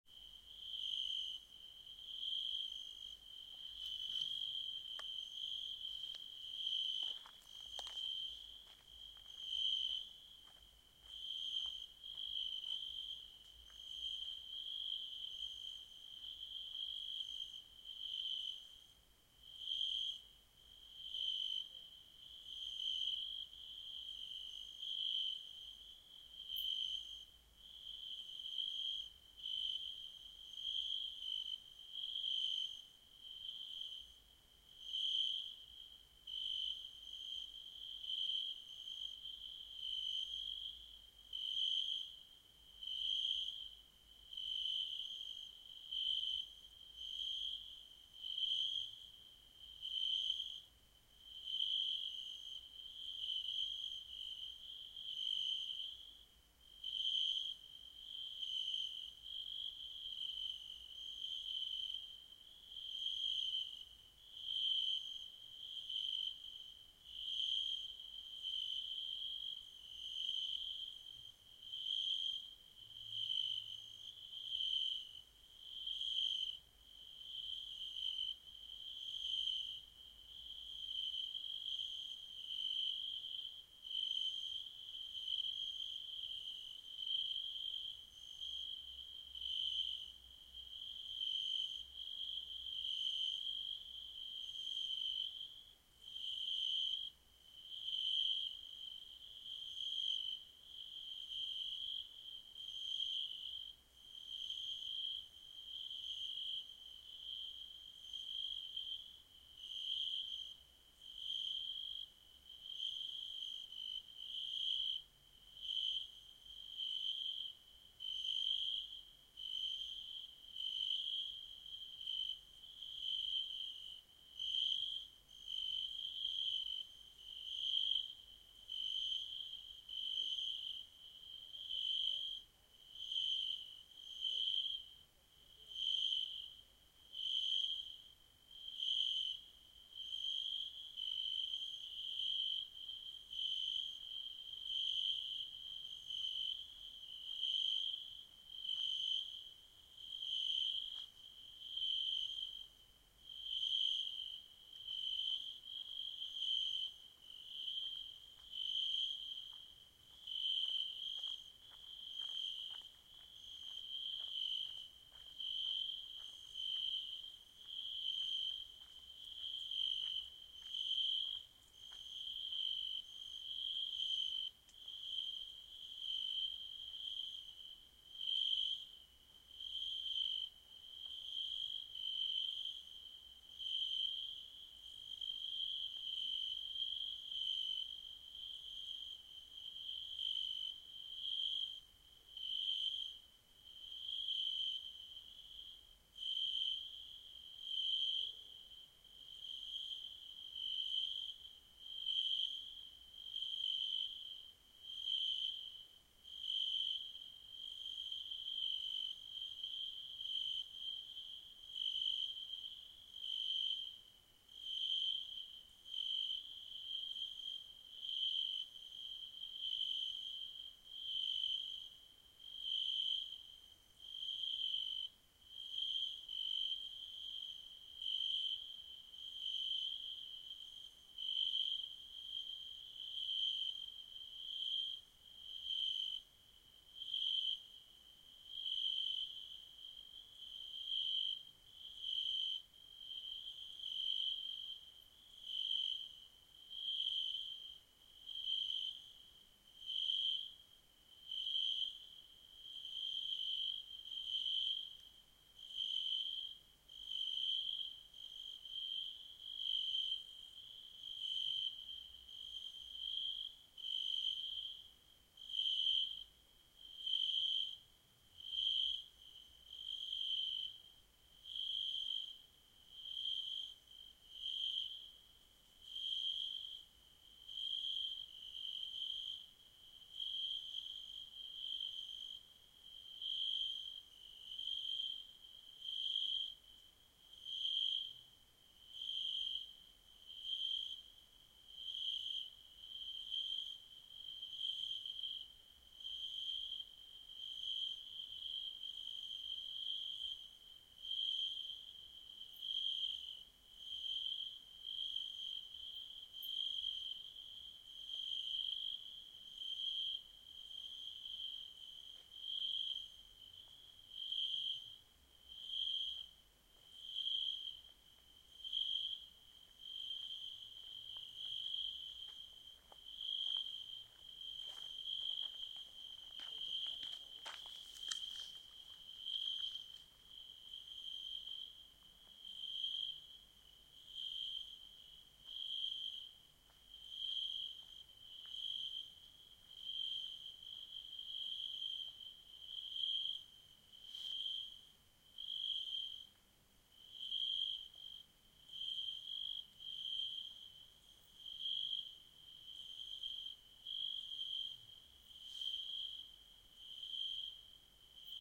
Barbariga Istrian Summer Nature Sound
Sound of nature in Barbariga, Istria, in the forest down by the seaside, recorder in summer, August 2015 with Zoom H5n and with additional mics besides.
Istria, Summer, environment, nature-ambience, nature-sound, naturesound, naturesounds